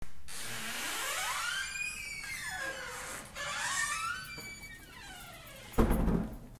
Squeaky Bathroom Door
This is the bathroom door from the EDD, it was so squeaky. Recorded with iPhone 8.
opening, squeaking, door, squeaky, squeak, creaky, open, close